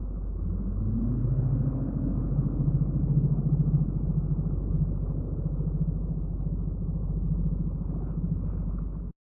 motorboat passes underwater sound
For a motorboat promotional video I was looking for underwater sounds. Than modified a motorboat sound recorded on field with audition and thats the result.
ambience, ambient, balaton, boat, field-recording, lake, modified, motorboat, natural, nature, trial, underwater, water